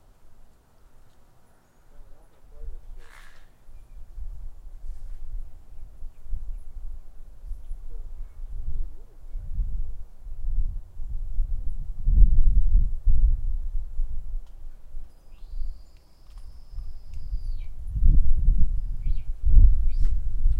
Out on the patio recording with a laptop and USB microphone. I placed the microphone up on top of the terrace this time to get sound from outside the privacy fence.
field-recording, patio, outdoor, atmosphere